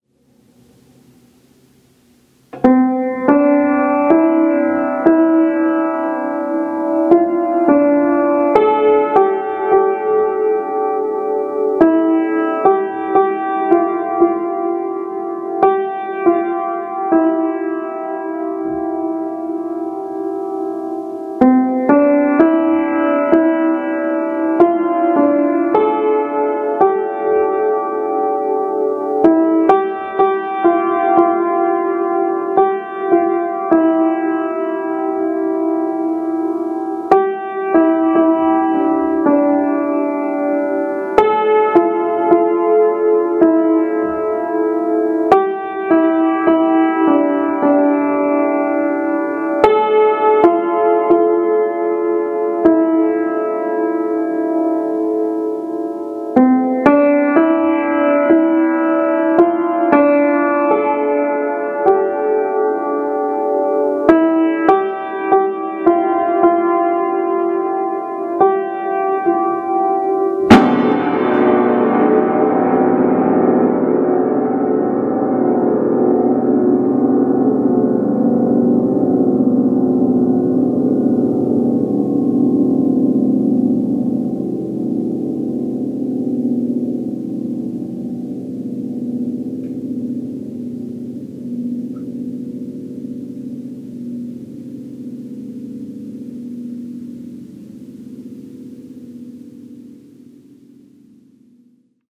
Weisst-du-wieviel 2D200000
Me playing an old children's rhyme on my old, of-tune piano...